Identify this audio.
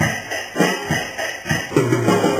Audio from a video of a friends kid on real drums- basic beat with roll.
loop, lofi, dither, drum